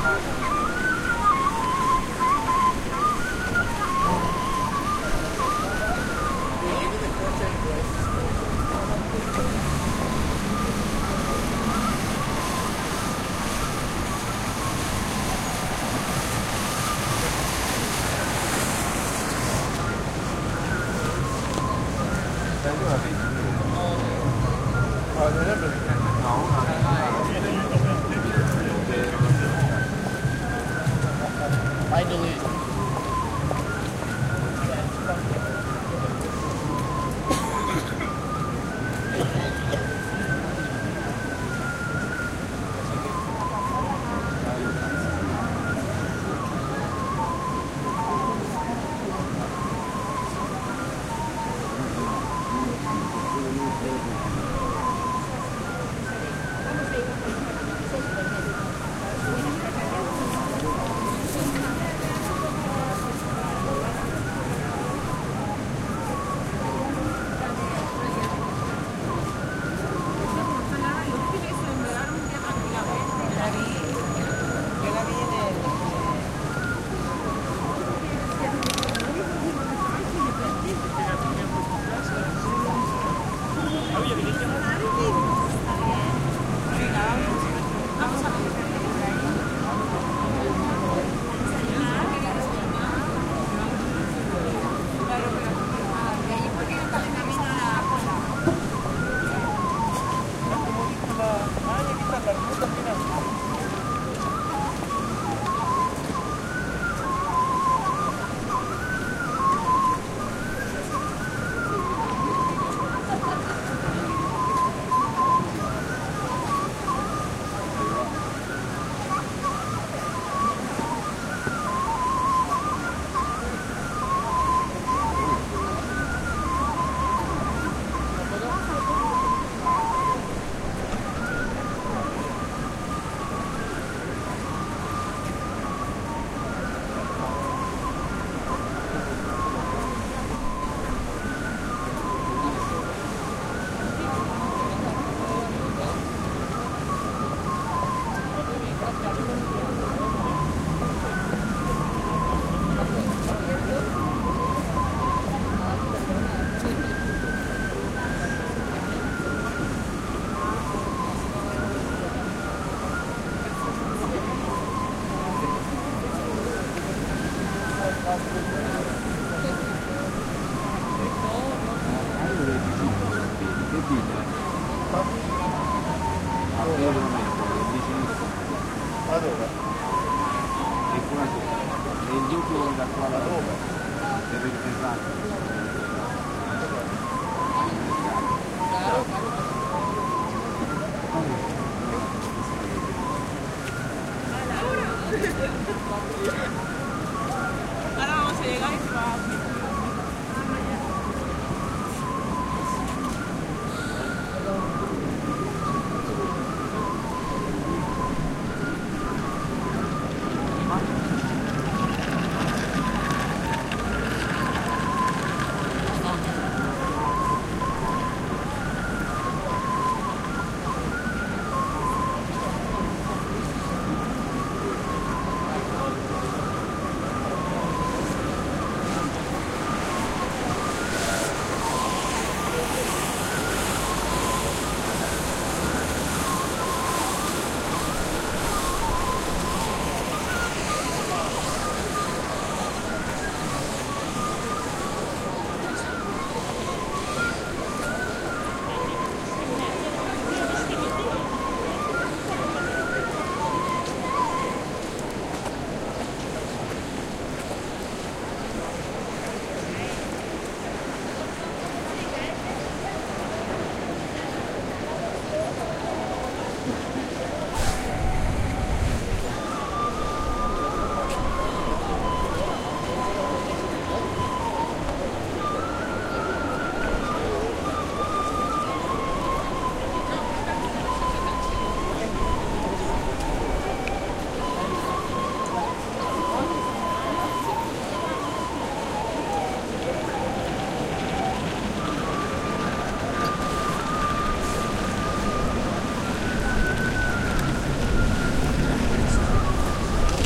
street ambiance with 'exotic' flute playing from a street musician of unknown origin. Recorded at Puerta del Sol, Madrid with Olympus LS10 recorder